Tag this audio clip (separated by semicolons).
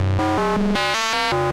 analogique; electronic; electronica; experimental; extreme; glitch; hardcore; idm; noise